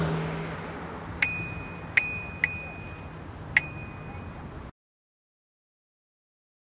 bike bell on the street